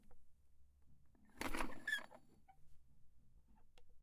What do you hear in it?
Recording of a creaky drum stool we have in uni.
Stool, Squeaky, Drum, H4n, Creaky, Zoom